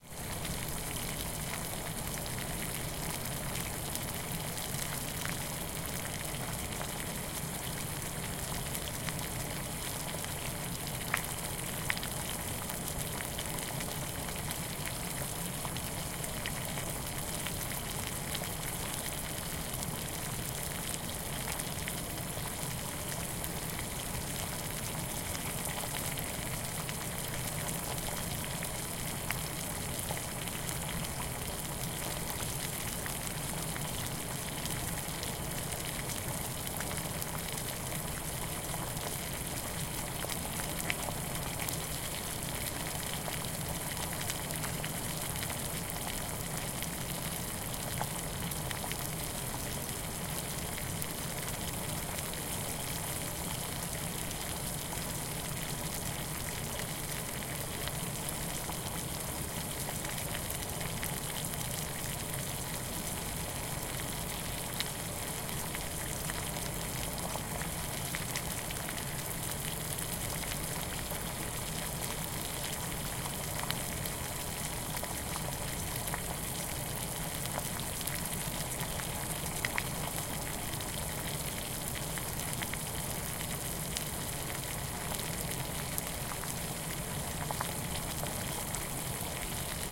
Eau-bouillante1
Boiling water in a saucepan on electric cooktop.
kitchen, water